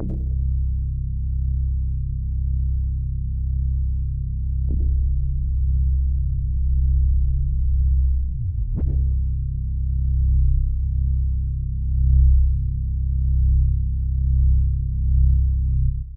A deep drone.